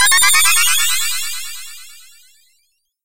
Sparkling Star 02
A star sparkling from far, far away. So far away in the vast universe.
This sound can for example be used in fantasy films, for example triggered when a star sparkles during night or when a fairy waves her magic wand - you name it!
If you enjoyed the sound, please STAR, COMMENT, SPREAD THE WORD!🗣 It really helps!
fairy, fantasy, flash, gem, magic, shining, sparkle, sparkly, spell, star, twinkling